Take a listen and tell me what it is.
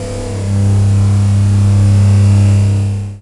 Spacecraft Hover 01
A spacecraft hovers
Spacecraft, UFO